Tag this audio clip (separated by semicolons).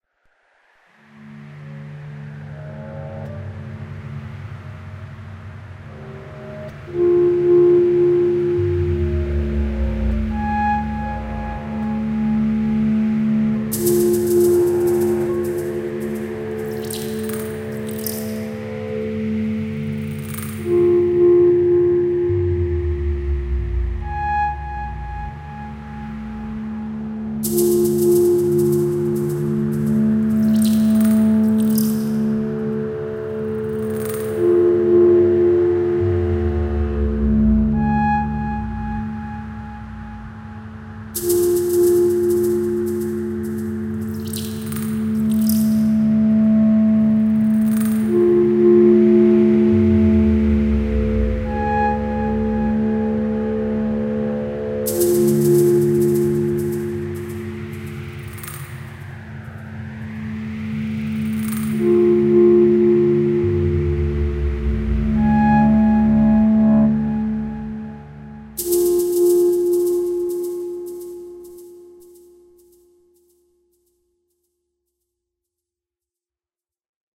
ambient; atmosphere; soundscape